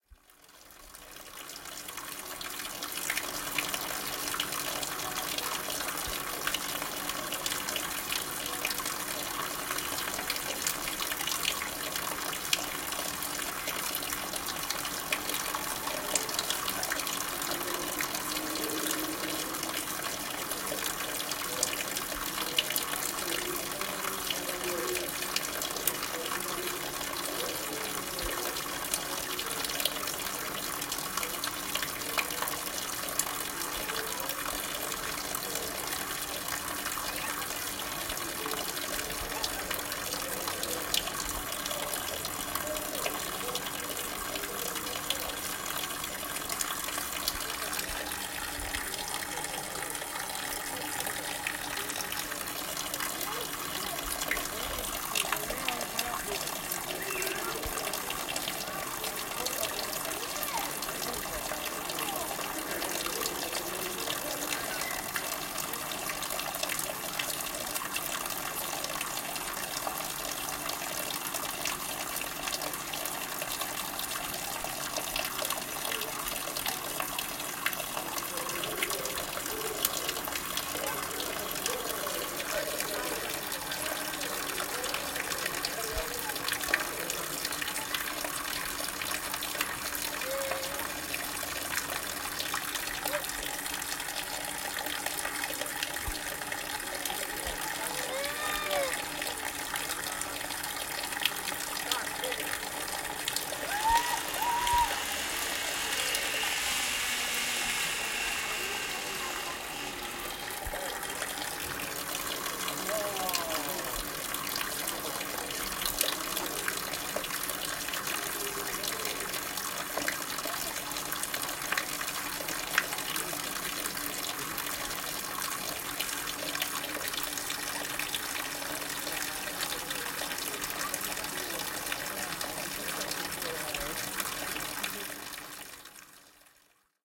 źródełko nad Maltą 15.09.2018
15.09.2019: noise of the fountain near of Malta Lake in Poznan (Poland). Recorder zoom h1.
Malta-Lake, field-recording, fountain, Poznan